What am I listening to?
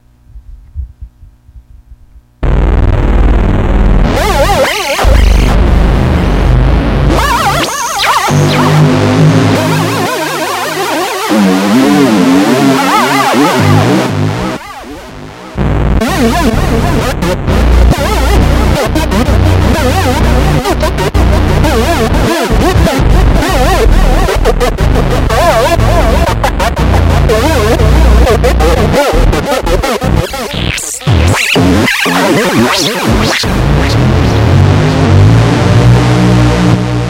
Uncut Synth
Last night I finished these but actually i did them months and months ago... Pills.... ahh those damn little tablet that we think make everything O.K. But really painkillers only temporarily seperate that part of our body that feels from our nervous system... Is that really what you want to think ? Ahh. . Puppy love..... Last night was so...